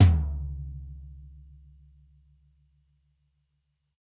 Dirty Tony's Tom 16'' 063
This is the Dirty Tony's Tom 16''. He recorded it at Johnny's studio, the only studio with a hole in the wall! It has been recorded with four mics, and this is the mix of all!
16, dirty, drum, drumset, kit, pack, punk, raw, real, realistic, set, tom, tonys